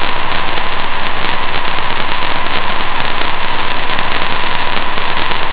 Experiments with noises Mandelbrot set generating function (z[n + 1] = z[n]^2 + c) modified to always converge by making absolute value stay below one by taking 1/z of the result if it's over 1.